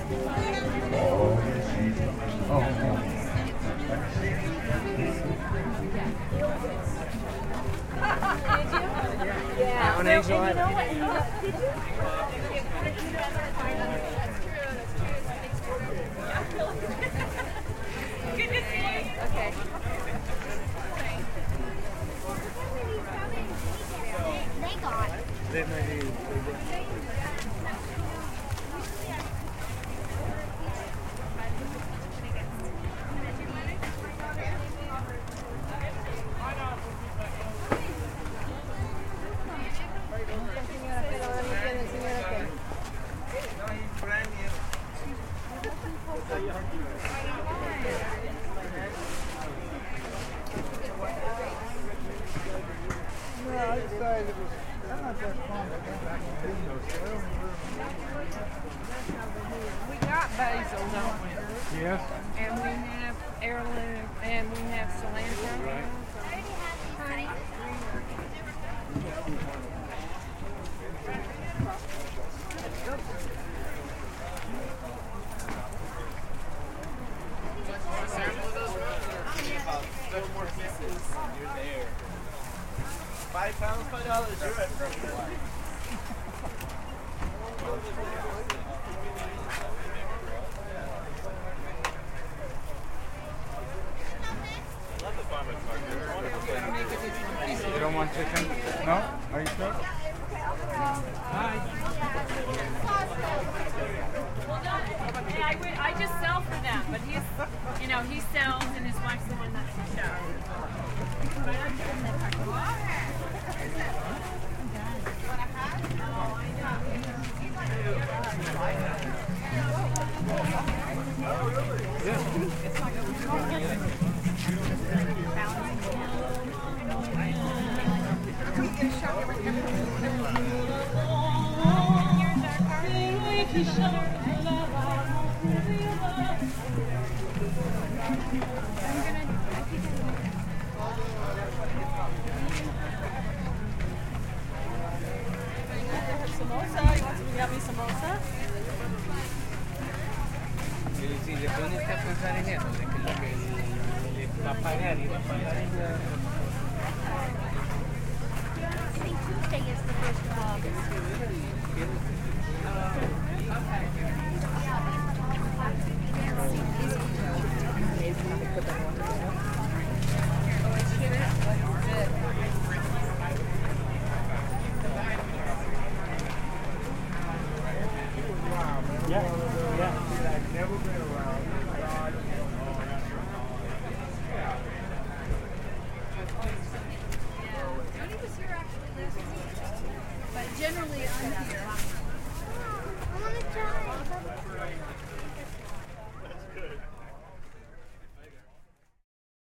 A soundwalk through the Marin County Farmer's Market in San Rafael, California, in August 2006. Features lively voices, background music, ambient crowd sounds, and some distinguishable conversations. Stereo; recorded with Neumann KM-84 on a Fostex.